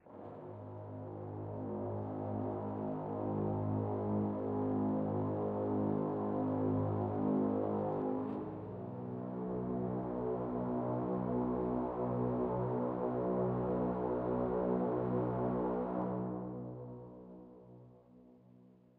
Violin long horror 2x note.
Software: FL Studio. Bpm 120
Ambience, Horror, Violin